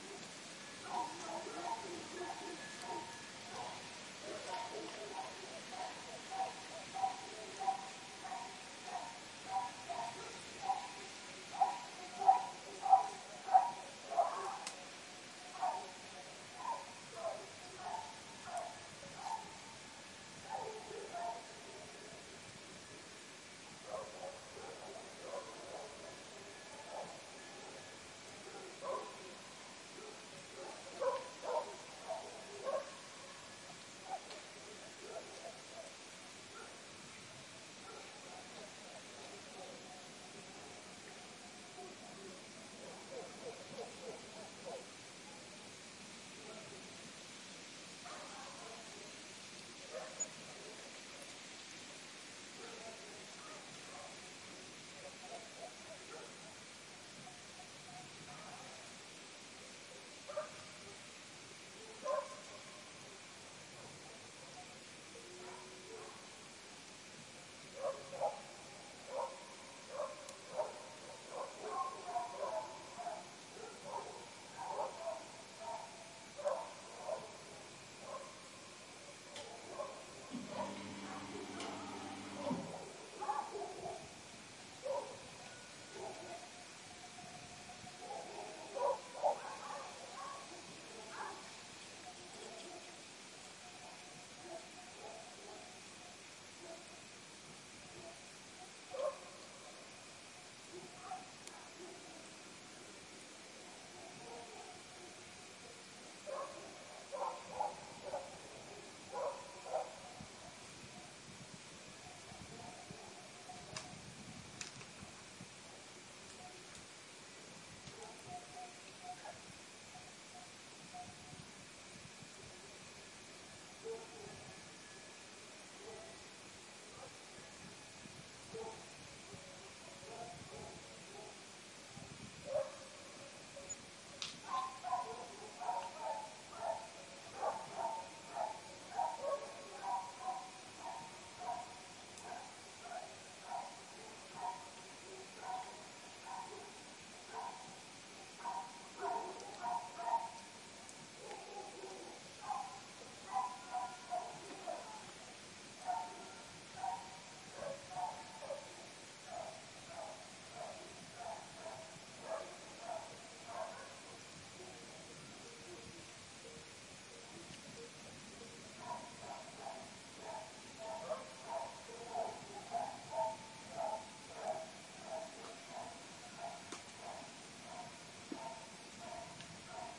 20171028 calm.night
Quite minimalist, just some dogs barking in the distance. Primo EM172 capsules inside widscreens, FEL Microphone Amplifier BMA2, PCM-M10 recorder. Recorded near Aceña de la Borrega (Extremadura, Spain)
field-recording, country, rural, night, dogs, ambiance